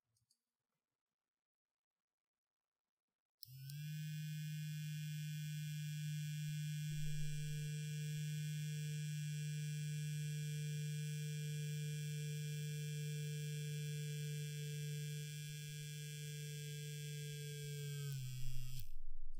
Sounds of a small vibrator or personal massager being turned on, running for a short time, and then being turned off. Recorded on Blue Snowball for The Super Legit Podcast.
electronic, vibrator, vibrating, foley, electric, vibration, massager